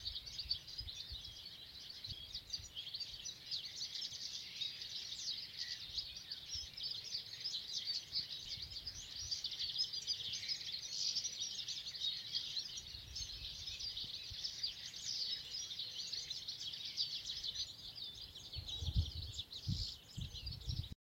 Loudly sparkling sparrows in early spring.